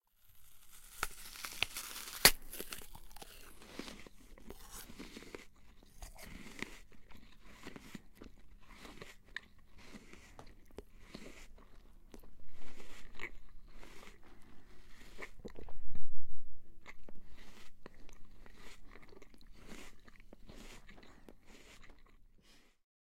Eating Apple
Recorded a friend eating an apple.